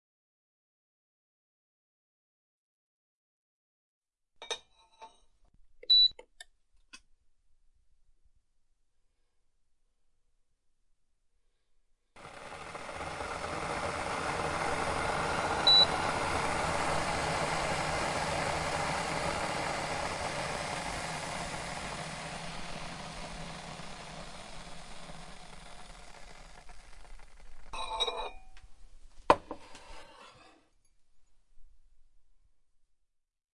Preparing a coffee by coffeemaker.
Czech, Pansk